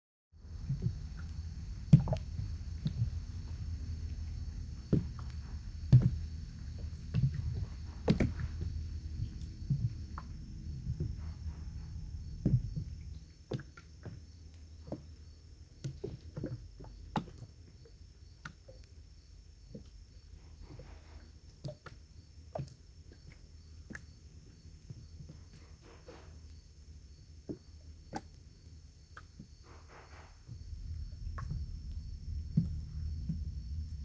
River bloop under docks
River making a bloop noise as it sloshes against the underside of some floating docks. Recorded on the Hudson River at Athens, NY.
Hey! If you do something cool with these sounds, I'd love to know about it. This isn't a requirement, just a request. Thanks!
bloop, river, water